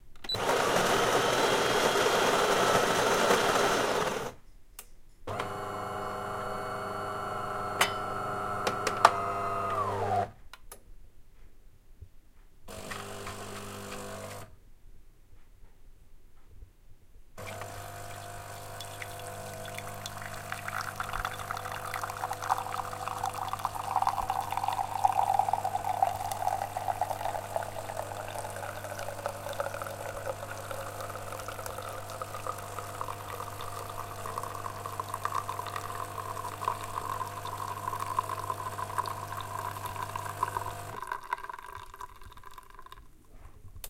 Automated Coffee Maker, Machine [RAW]
cafe, cup, household